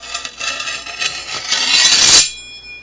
Sword being drawn from scabbard.
Battle, Draw, Fantasy, Scabbard